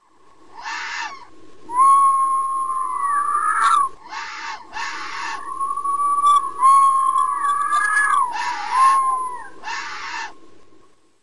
Alien Fox Bark
Elictronically altered fox barks - layered and modualted
SofT Hear the Quality
Alien, alien-fox, fauna, fox, Futuristic, Sound-Effects